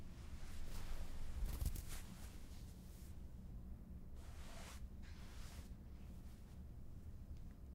Blanket covering
A blanket being smoothed over.
blanket, person